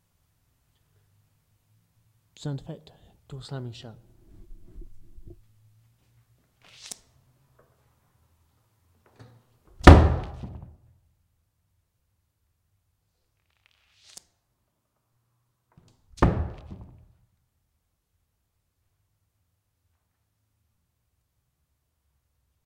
door shut
doors, open, close, door